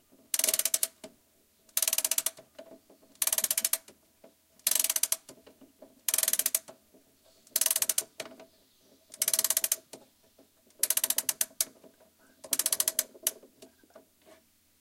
winding a wall clock with a key. Olympus LS10 recorder internal mics
time, wall-clock, tictac, field-recording, winding, ticktock, bell, spring